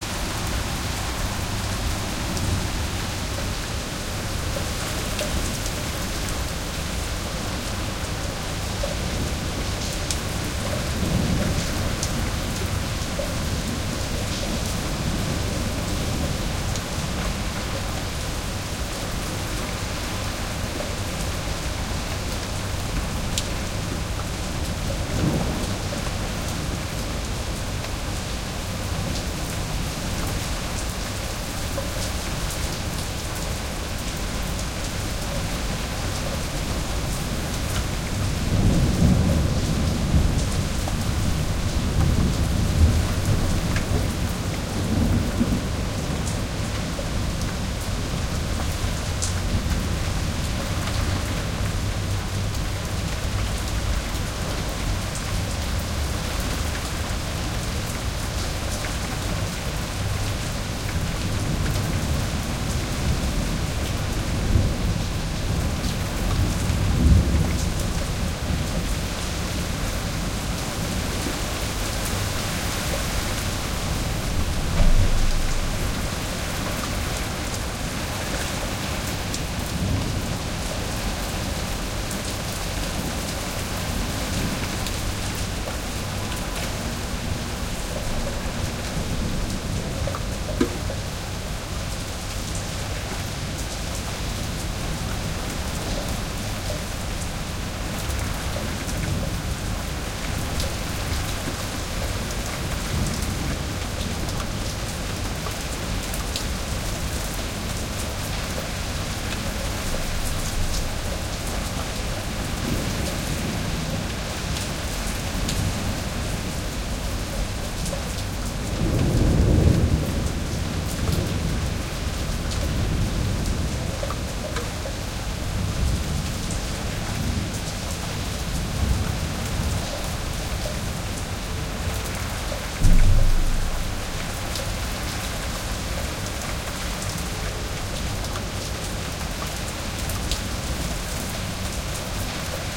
After days of 30+ °C a thunderstorm hit our city this evening. Recorded with a Sony PCM-M10 recorder.
outdoor, thunder, rain, summer, field-recording